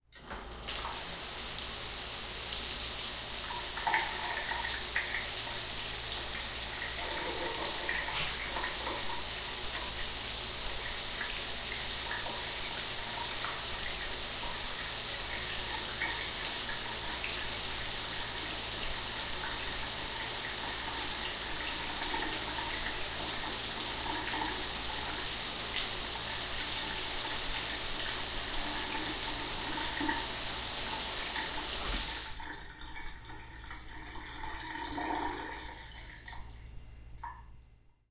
Raw audio of a shower being turned on, left for about 30 seconds, and then turned off and the remaining water being drained. The recorder was about 1.5 meters away from the shower.
An example of how you might credit is by putting this in the description/credits:
The sound was recorded using a "H1 Zoom recorder" on 29th August 2016.
Shower, Running, Wet, Water, Bathroom